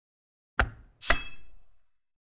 HaloNeedler Reload
halo, needler, reload, scifi